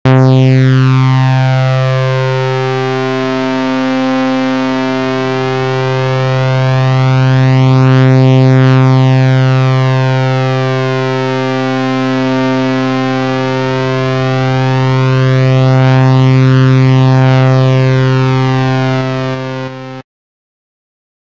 if simple 3saw c4 stereo
Simple 3Saw C4 Note detuned STEREO Sample; created in Milkytracker with the Synthesisfunction in the Sampleeditor, the Instrumentseditor plus one Pattern to execute the C4 Notes. This may be loaded into a Sampler and edited with Envelopes and a Filter etc. to get a complete Sound. Thanks for listening.
c4, mad-science, rough, saw, simple, stereo, waveform